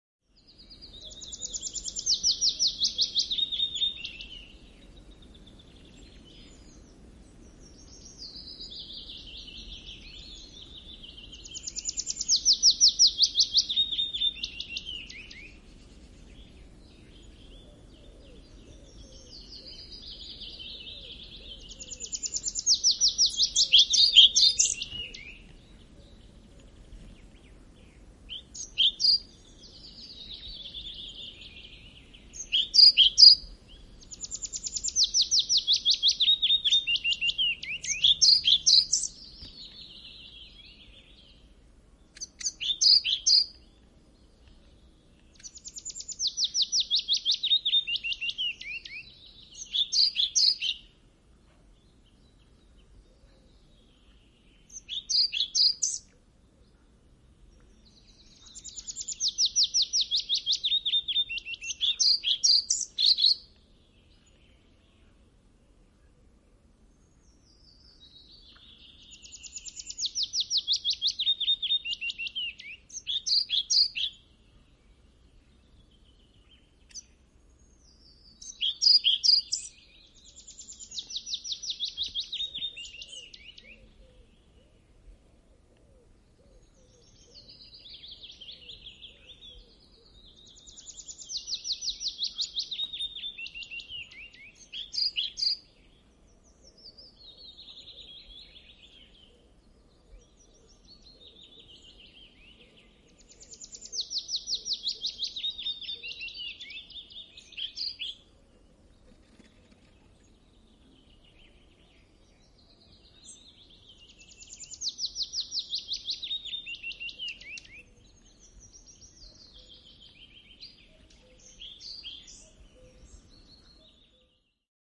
Linnunlaulu, lintuja metsässä / Birdsong, birds in the forest, calm
Metsä, kesä, pikkulinnut laulavat, etualalla pajulintu ja kirjosieppo, rauhallinen.
Paikka/Place: Suomi / Finland / Lohjansaari
Aika/Date: 30.06.1998
Birds, Field-Recording, Finland, Finnish-Broadcasting-Company, Linnut, Luonto, Nature, Soundfx, Summer, Suomi, Tehosteet, Yle, Yleisradio